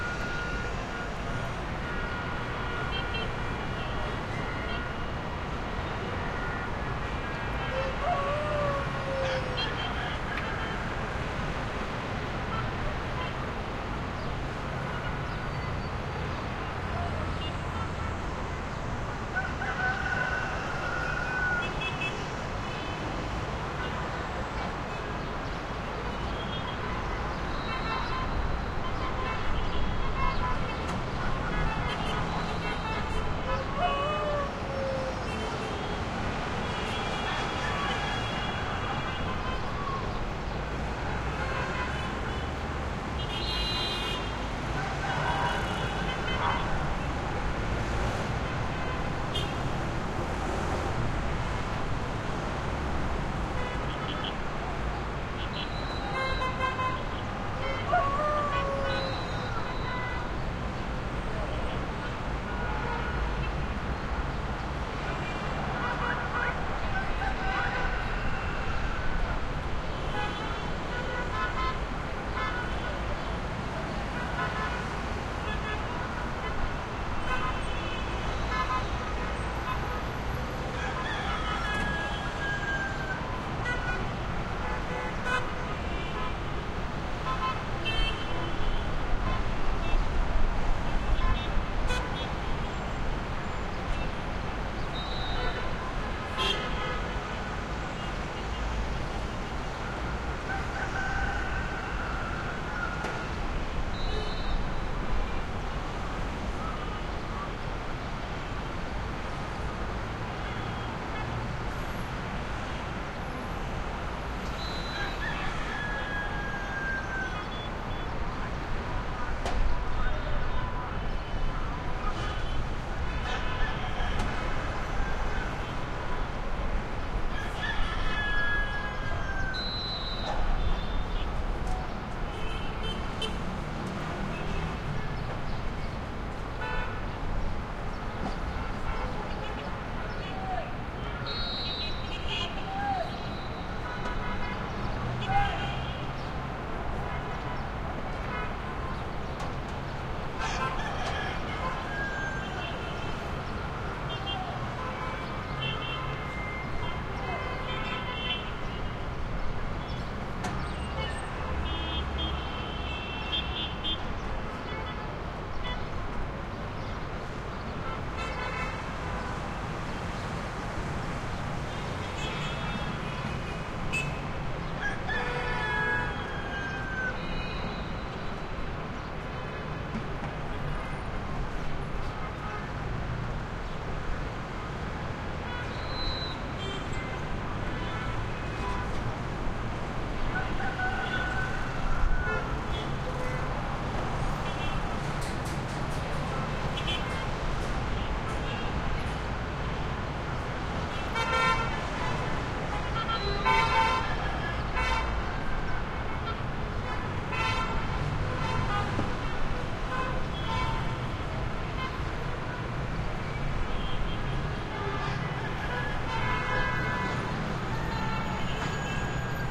skyline Middle East distant traffic horn honks and city haze09 busy dense with rooster Gaza 2016
haze, honks, Middle, traffic